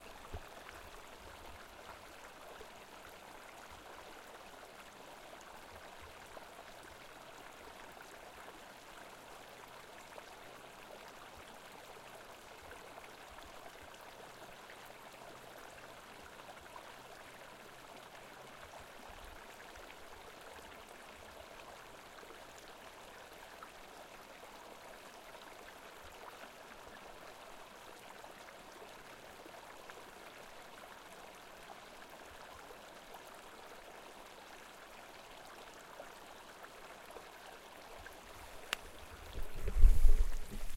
Creek Running water
A Creek in Montana. recorded with zoom h5.